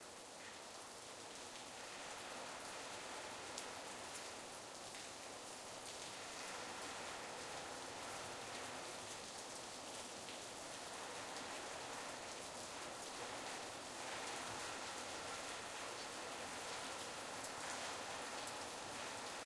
Mild Rain ambience edlarez vsnr